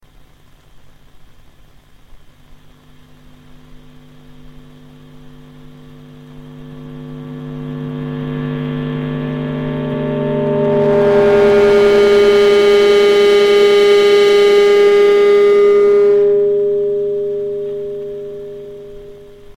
guitar feedback
Just the feedback from my tenson-amplifier.
distortion, distorted-guitar, electric-guitar, feedback